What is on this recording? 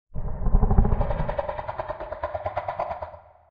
Creature deep growl
Blending walrus and a stork to depict a predator creature.
Sounds by:
beast, creature, creatures, growl, horror, monster, scary